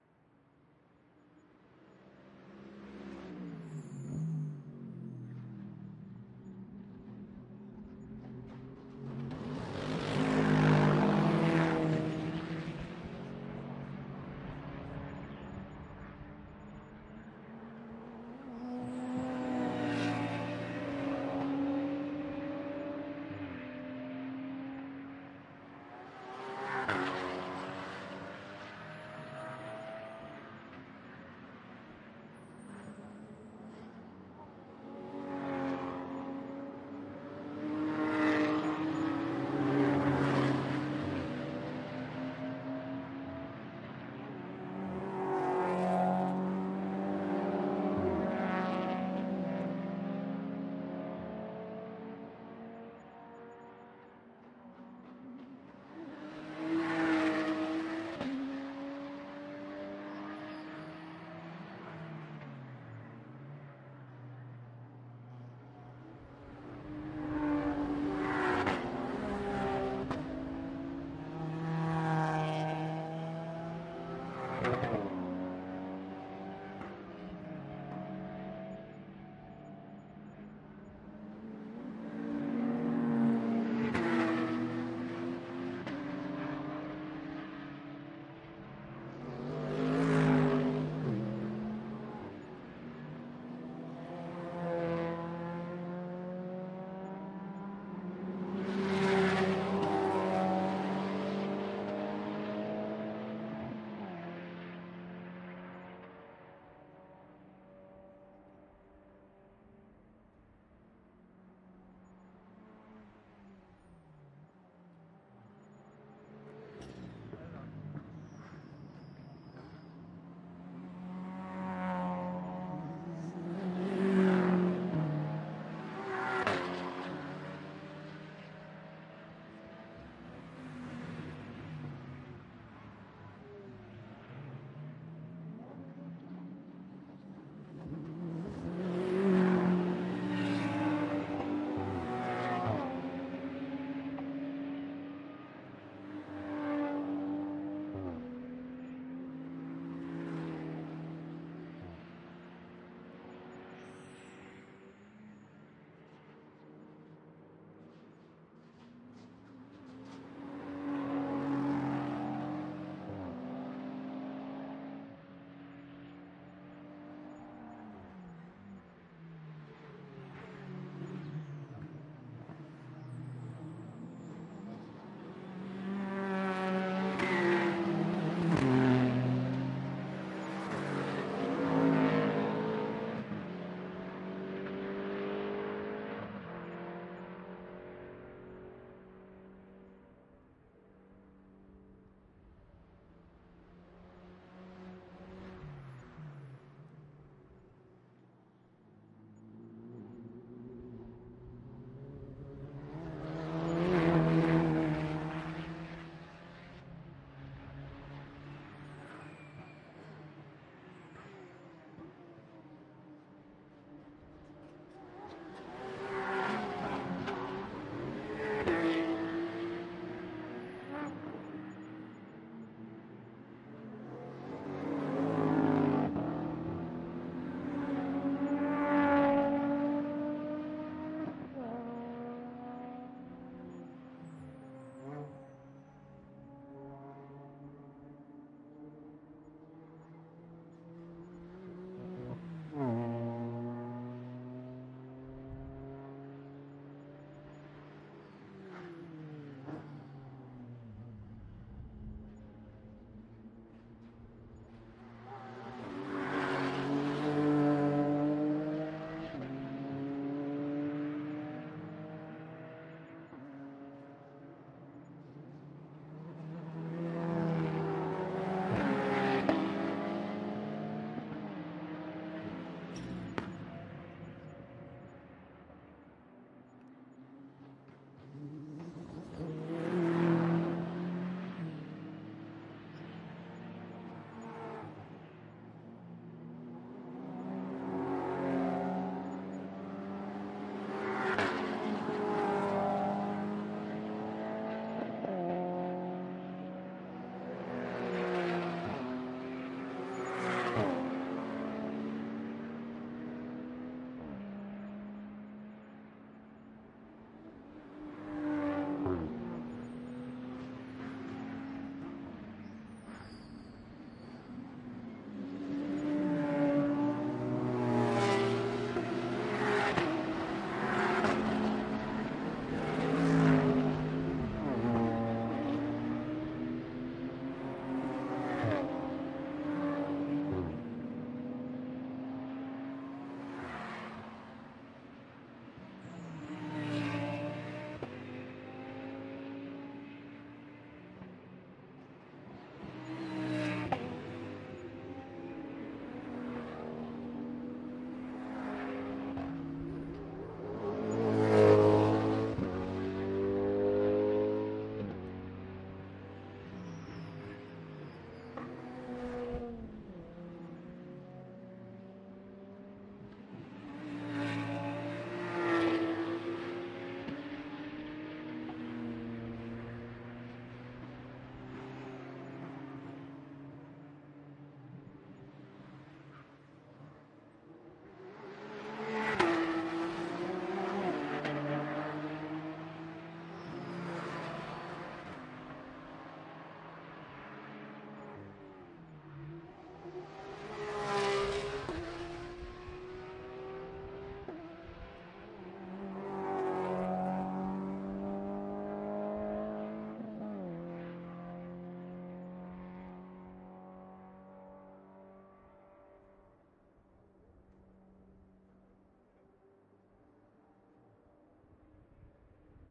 Car race, Nordschleife, VLN, several cars accelerating, backfire, at Carousel
Several race cars passing by at a VLN race at the Nordschleife, Germany
Recorded with a Zoom H1 (internal mics)
car, engines, field-recording, Germany, Nordschleife, race, racing, VLN